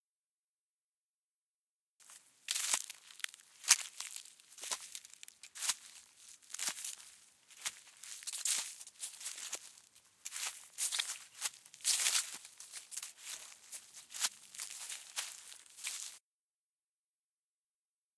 Slow walking leaves.